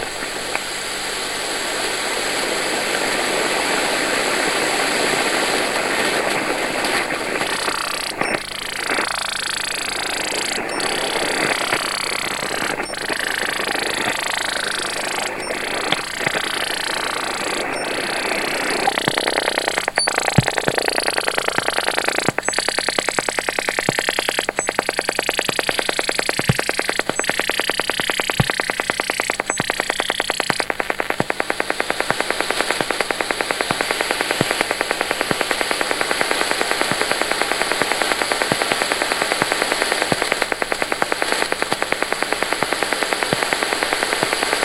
Data and static clip
A recording of a data transmission. Original recording made using the record function on the Twente University radio receiver website:
Original recording 11-Jul-2014, 22:50 GMT.
Other details not known.
This sound has an interesting spectrum display.
AM, Twente, dare-28, data, radio, static, telecommunication, transmission